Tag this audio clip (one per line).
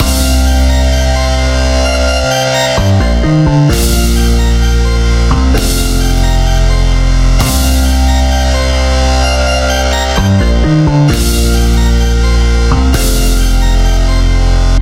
cool
loops
loop
groovy
80s
retro
hard
beat
power
keys
happy
movie
synth
improvised
vintage
guitar
chords
hope
hard-rock
film
drums
kick
snare
series
drum
splash
bass